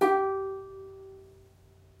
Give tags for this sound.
string ukulele uke note

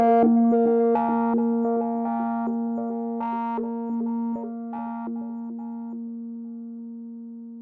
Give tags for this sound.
acidthingy experiment

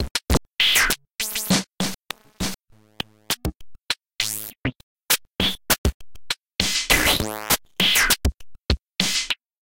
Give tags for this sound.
bass glitch sound-design snare loop beat drum digital 100-bpm 4-bar

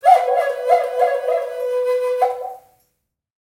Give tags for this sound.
woodwind flute

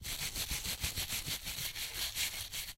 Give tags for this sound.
brush clean bathroom small hand

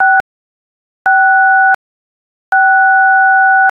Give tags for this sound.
6 keypad six tones

